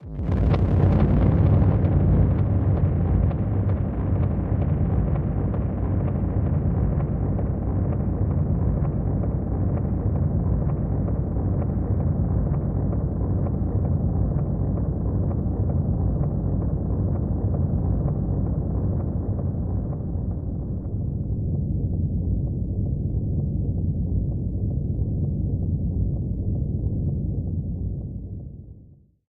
womb secret
noise warm storm
noise, warm